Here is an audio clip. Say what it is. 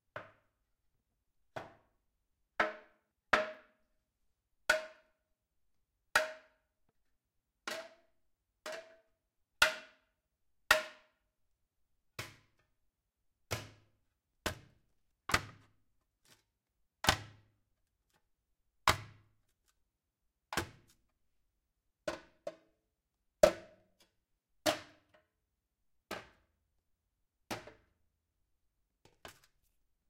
thin metal plate against wood, stone and metal
Hitting things against each other: a metal plate, oven made of stone, pieces of iron things, wood pieces
attack, bash, cczero, club, collision, free, freeware, hit, iron, kollision, mash, metal, schlag, stone, strike, thin, wood